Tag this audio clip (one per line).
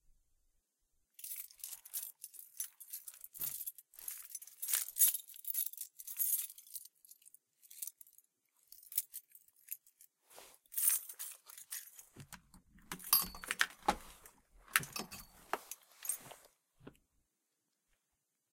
door
key
keyring
unlock